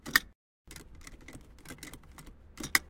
Peugeot 206 - Security Belt
vehicle, 207, peugeot, car